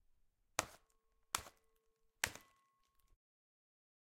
Plastic packaging cushions being pierced loudly with a scissors.
plastic-bag, OWI